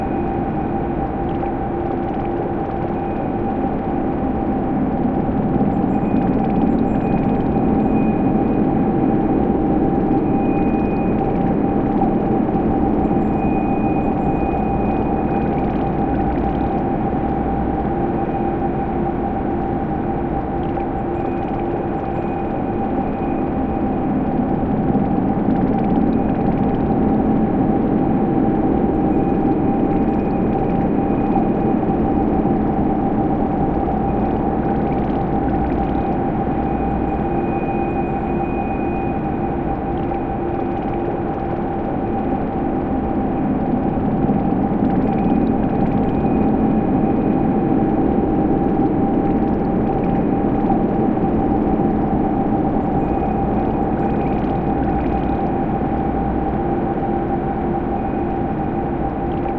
archi soundscape horror3
Instances of Surge (synth) and Rayspace (reverb)
Sounds good for scary-type scenes.